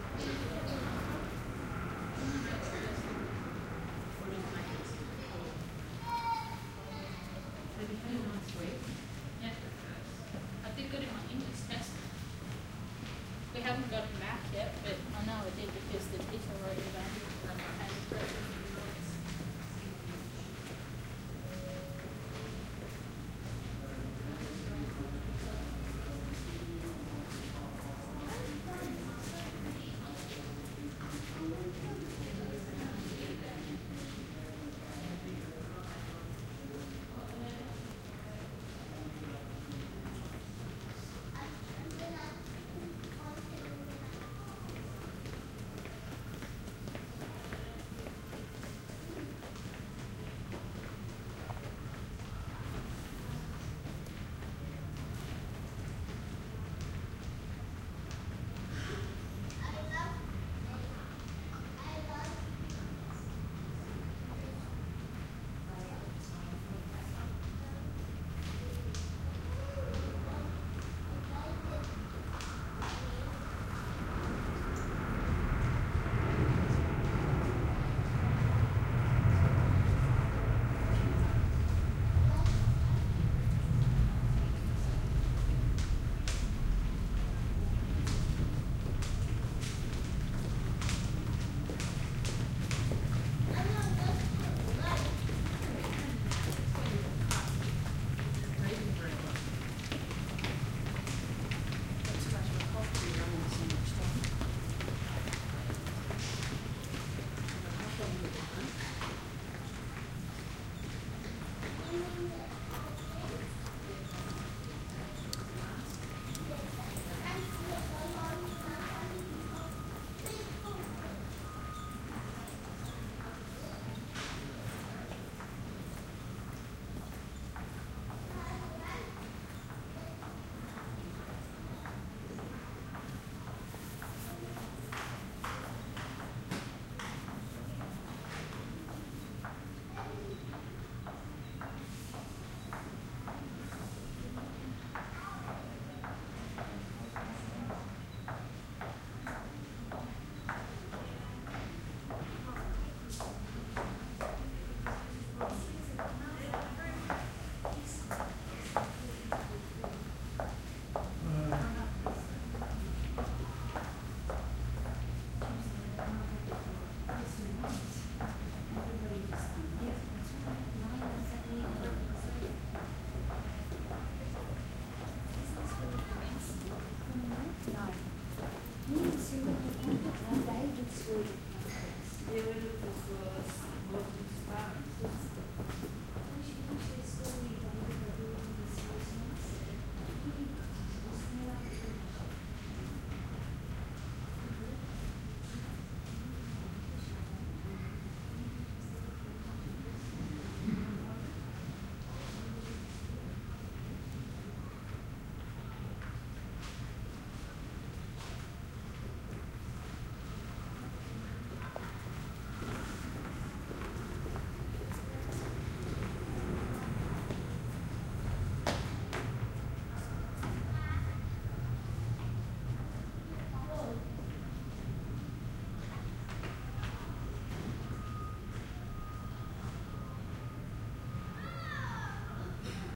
Airport Passage Brisbane 2
Ambient sounds of people passing on a hard floor in a long passage between a terminal and the main airport lobby. Recording chain: Panasonic WM61-A microphones - Edirol R09HR
airport, crowd, foot, foot-steps, passing-conversations, people, steps, walking